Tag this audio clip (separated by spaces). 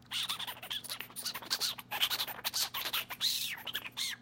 animal call creature sealife sound squid